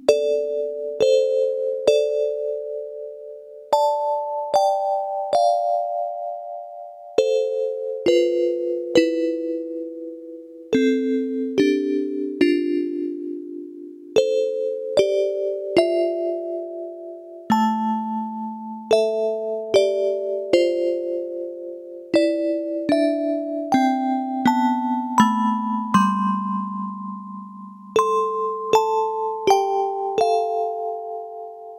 Yamaha PSS-370 - Sounds Row 4 - 14
Recordings of a Yamaha PSS-370 keyboard with built-in FM-synthesizer
FM-synthesizer, Keyboard, PSS-370, Yamaha